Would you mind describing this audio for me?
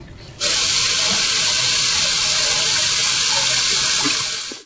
PARVY Lucas 2016 2017 heavyRain
To create this sound I recorded water in a urinal. I change the speed and the pitch to have a sound close the an heavy rain. I changed the amplitude to have something louder, but I have some parasite noise who I didn’t like so I use noise reduction to delete it. Then I had a fade out effect to finish the sound. Its sound like a heavy rain.
Selon la typologie de Schaeffer, le son créé se rapproche d’une itération complexe.
/////// Morphologie
Typologie : X ‘’
Masse: groupe nodal
Timbre harmonique: aquatique
grain: Rugueux
allure: son continue avec quelques variations
dynamique : L’attaque légèrement abrupte puis le son est continu
profil mélodique: pas de variation
weather, storm, raining